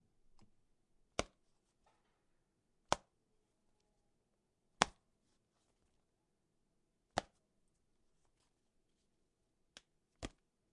baseball in mit
baseball slapping into mit
baseball, mit, slap